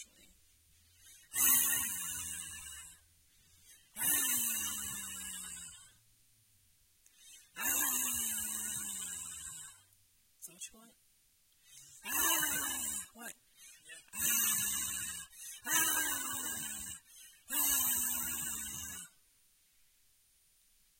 woman growl
rawr, woman, mean, girl, angry, vampire